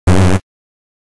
Wrong Ball
space, game, Alien